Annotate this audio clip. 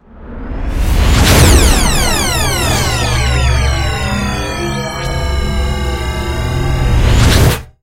Sound composed of several layers, and then processed with different effect plug-ins in: Cakewalk by BandLab.
I use software to produce effects:
abstract, atmosphere, transition, opening, stinger, morph, glitch, drone, moves, horror, metalic, scary, woosh, cinematic, destruction, rise, game, hit, futuristic, background, impact, metal, transformation, transformer, noise, dark, Sci-fi